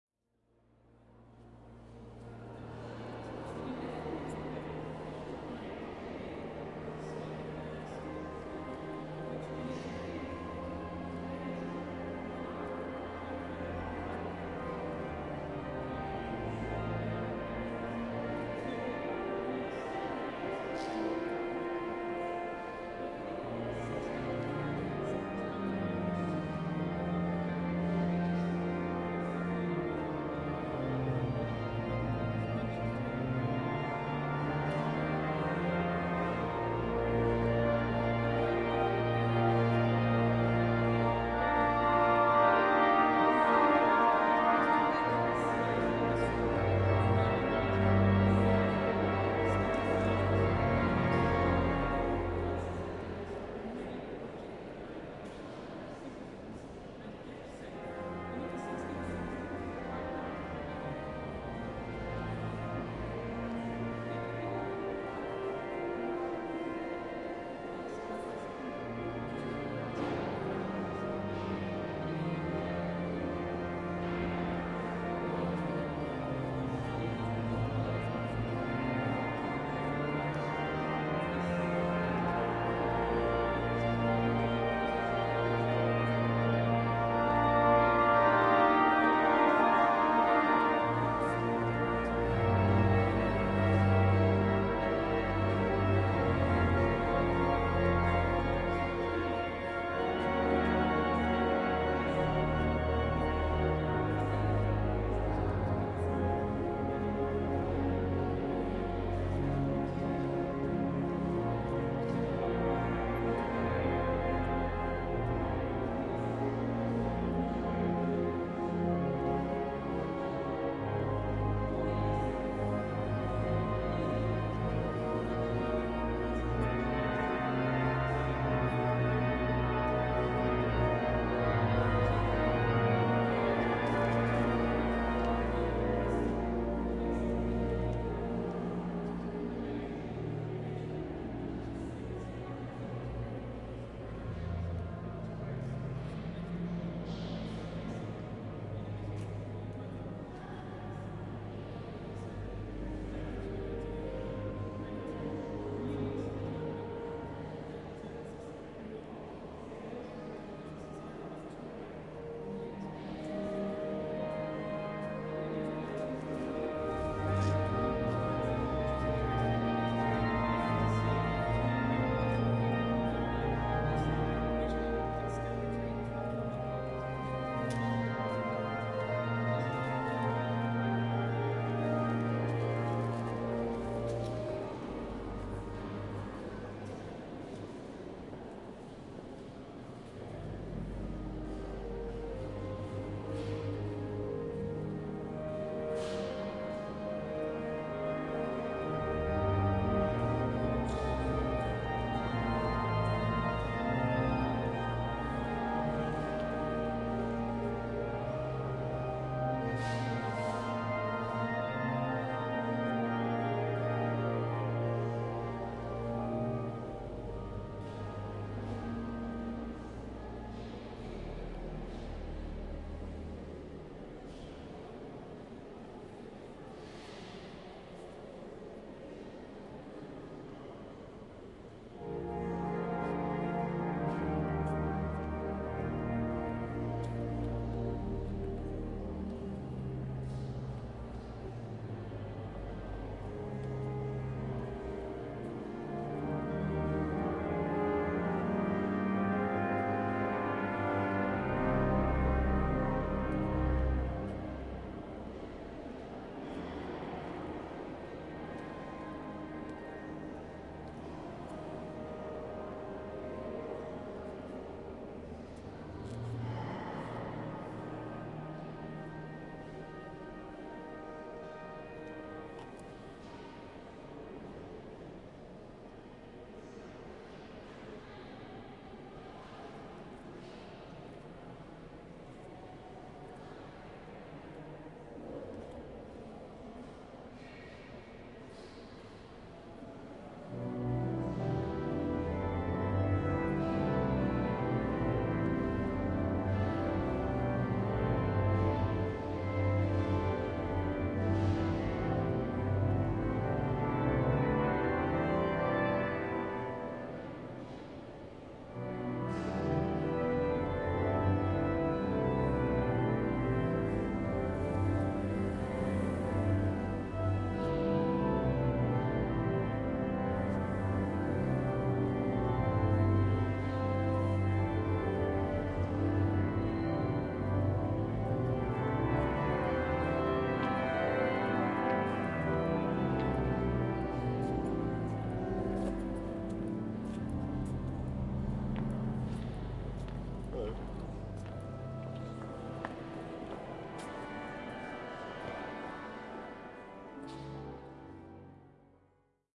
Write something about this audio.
Cathedral Ambience 1 (XY Stereo)
Winchester Cathedral Ambience. Visitors walking and talking and a organ playing. Recorded in XY and MS stereo for 4 channel surround.
Ambience, Cathedral, Organ